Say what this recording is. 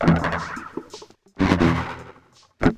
deep echo tube 1 168 bpm
chilled solo guitar from a friend
chill, guitar, solo